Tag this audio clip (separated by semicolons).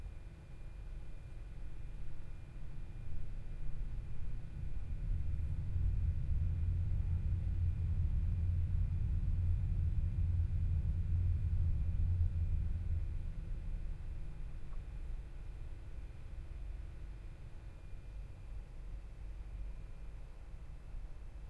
carpet; Common; indoor; Room; quiet; subway; line; absorbent; central; Senior; fridge; noise